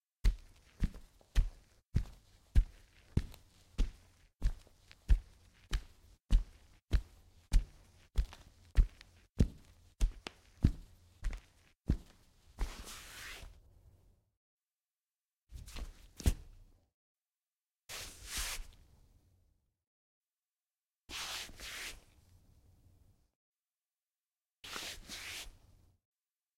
footsteps socks parquet
Slowly walking on a wooden floor wearing socks.
EM172 -> Battery Box-> PCM M10.
walk, slow